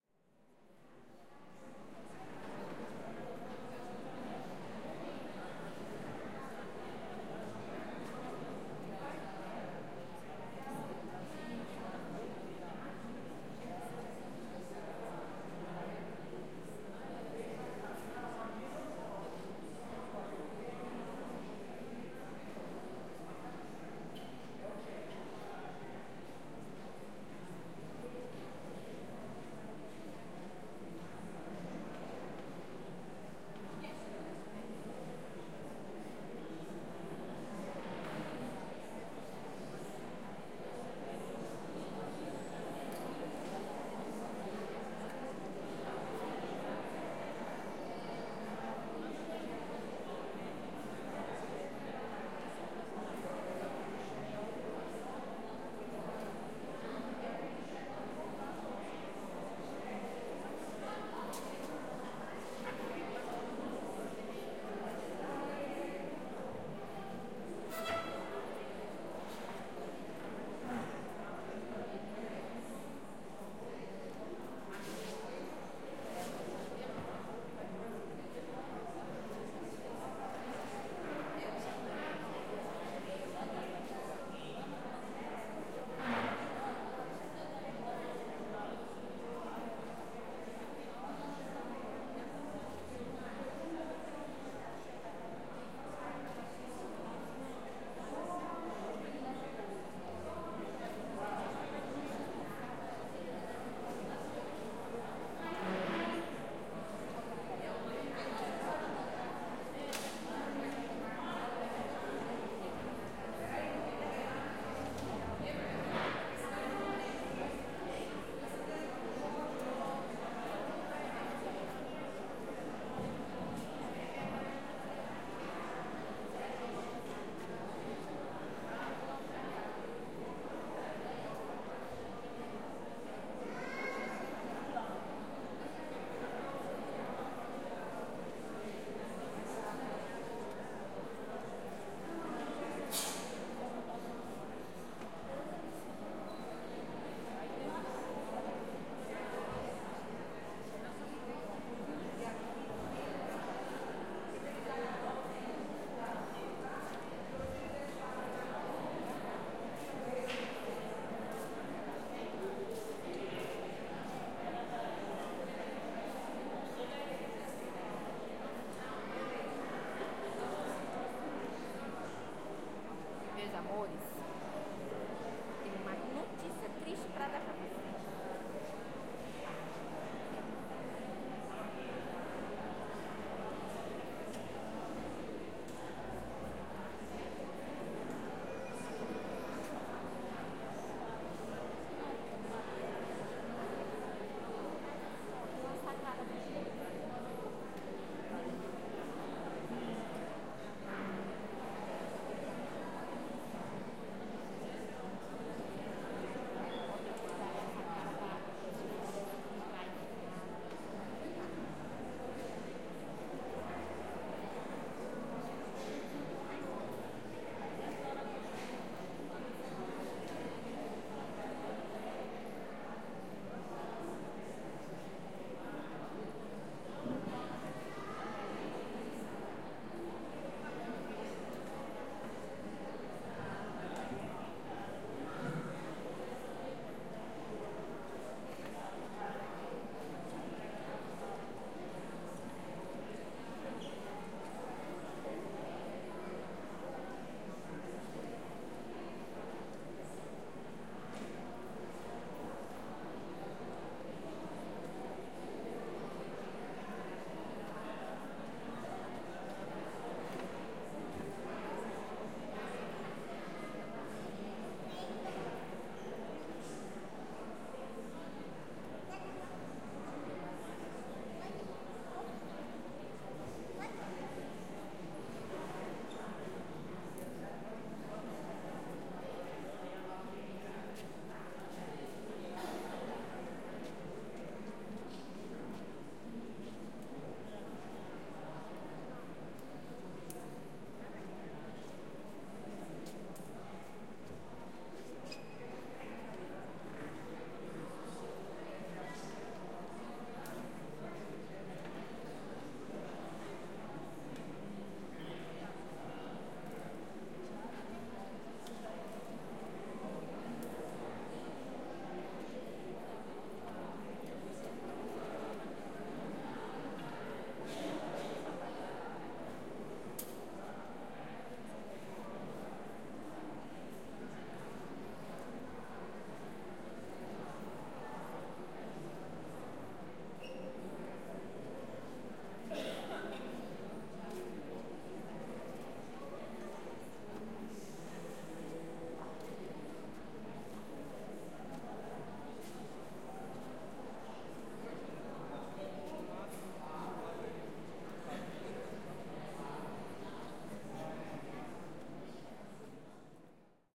Walla medium sized church
Walla recorded in medium sized church. Language indefinite most of the time.
Catholic Church of the nineteenth century in São Luiz, Maranhão, Brazil.
Stereo XY 120º recorded with built-in Zoom H4n mics.